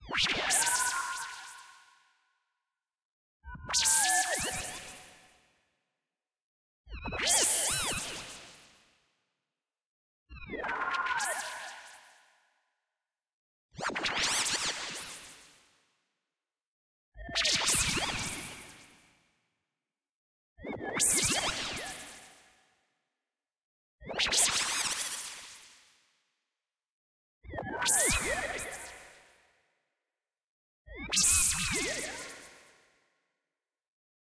Magical fizzlerz 1
A sound when something is being processed magically.
bubbles, component, fizzles, magic, recipe